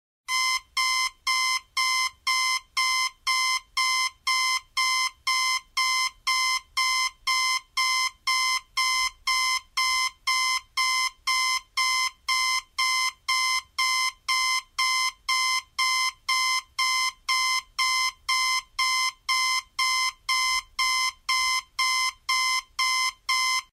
Digital Alarm Clock Sound Effect
Morning alarm clock buzzing.